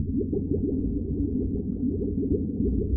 bubbles.deep.loop
Low-pass filter applied to the sound of bubbles.
deep, water, loop, underwater, bubble